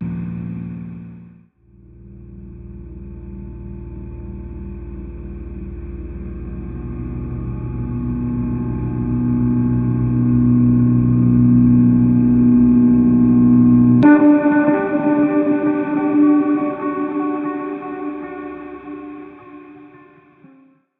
ambient guitar pad
created live on laney vc30 with telecaster, tc delay, ernieball volume - excerpt sample from my Black Bird EP